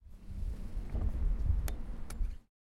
2 Button - ext
Button in exterior